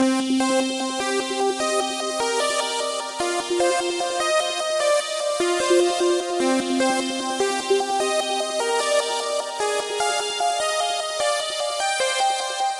synth sequence with high distortion.